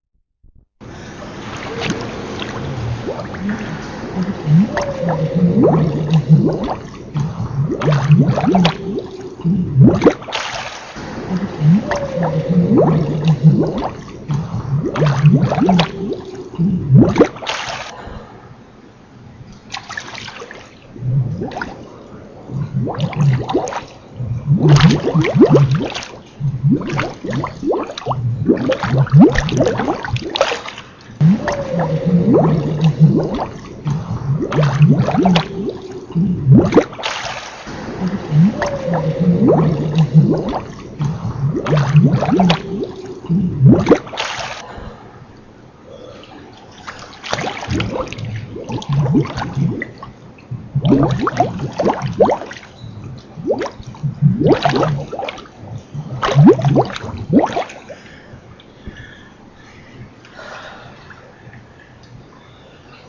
musical bubbles
bubbles, gurgle, refrain
field, recording, underwater